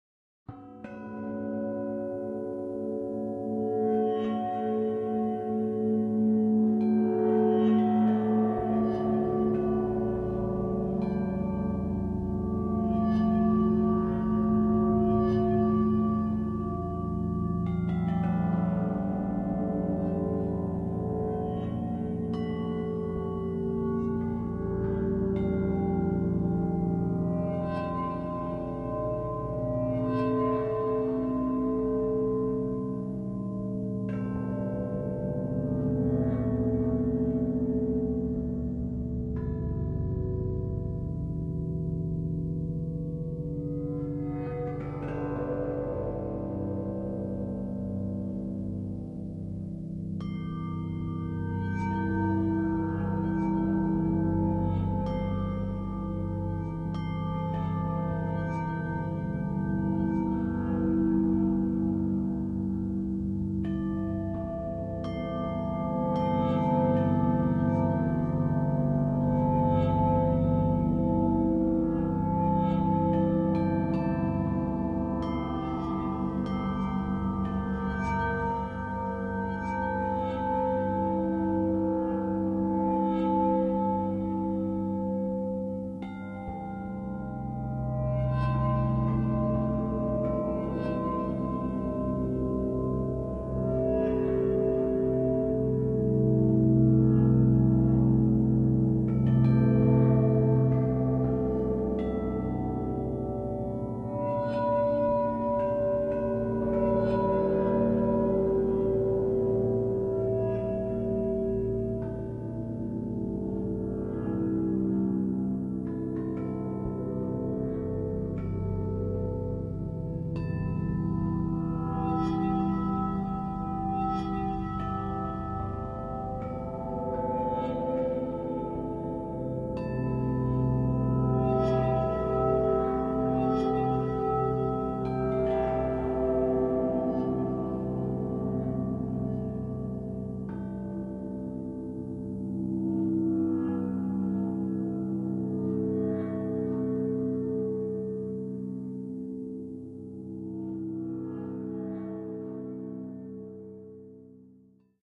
Low Slow Metal
This soundscape has its origin in struck metal tones, bell tones, and wind chime samples. The resulting sounds have been time stretched, reversed and slowed down to produce two minutes of languid metallurgy.
evolving, dreamy, bell, soundscape, abstract, resonant, metal, metallic, tonal, wind-chimes